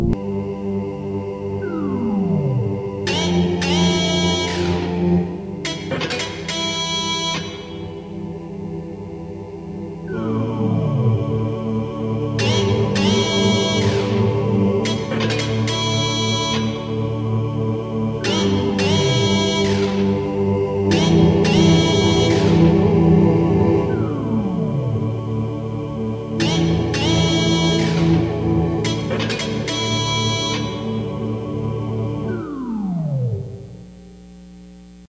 midian gates
i made this on my old keyboard. i have a Wave file that's wrongly labeled as this one.
relaxing, weird, lsd